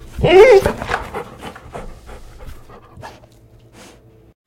Dog Whine 1
animal, whine, dog, whining